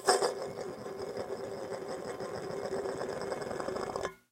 Can roll
rolled a can.
can, coke, pop, roll, soda